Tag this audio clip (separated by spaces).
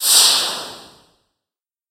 alien big boss cartoon purge rpg traitor